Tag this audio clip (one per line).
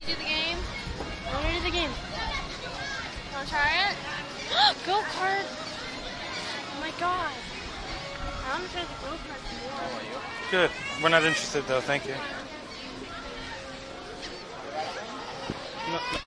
balloon
atlantic-city
boardwalk
field-recording
dart
game